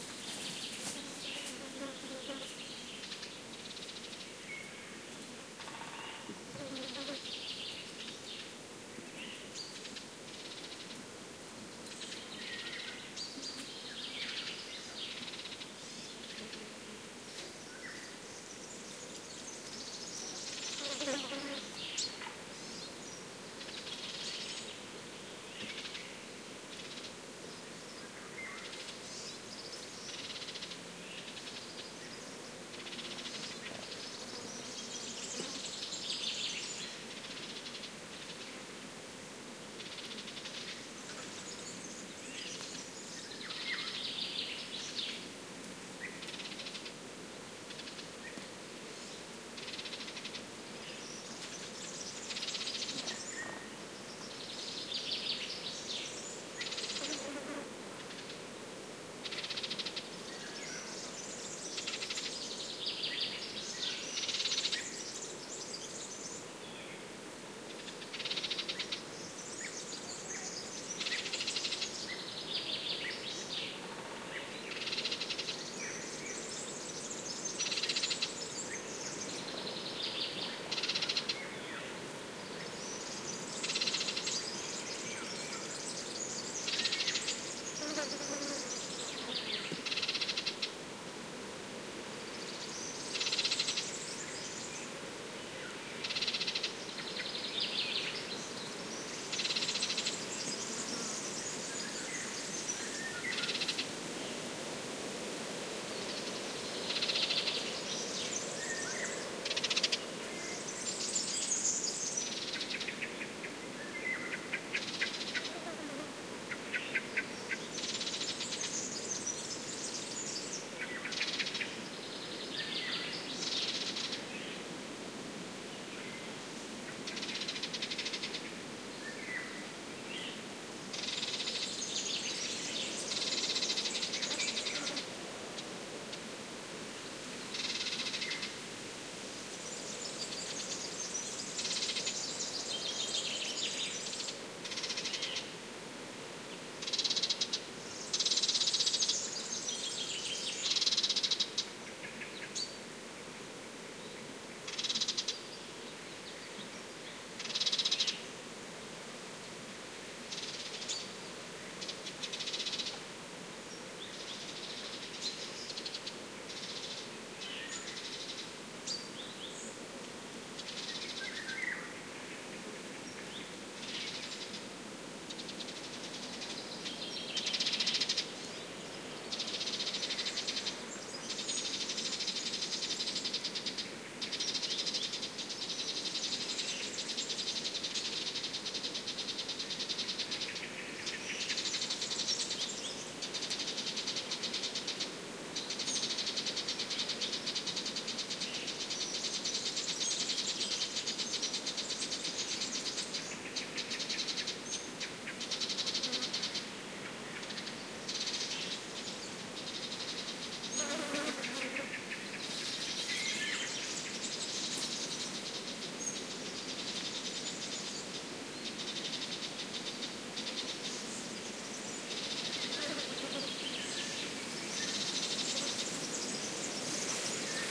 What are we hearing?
20120609 forest spring 02
Spring ambiance in Mediterranean forest, with wind noise and many birds calling (warblers, oriole, cuckoo). Recorded at the Ribetehilos site, Doñana National Park (Andalucia, S Spain)
golden-oriole, Mediterranean, warbler, cuckoo, oriolus, wind, spain, Donana, forest, woodpecker, doana, field-recording, spring